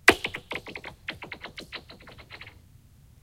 Ice Hit 8
break, ice-crack, foley, melt, crack, ice